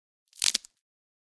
Cracking Knuckles
simulated knuckle cracking
crack, cracking, joints, knuckles, snap